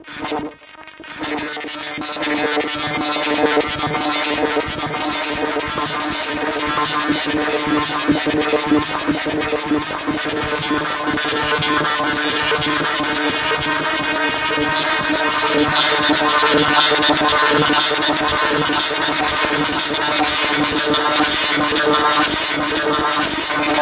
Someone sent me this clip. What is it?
Electric Wasps
Electronic wasps taking bytes of ram.
weird, insect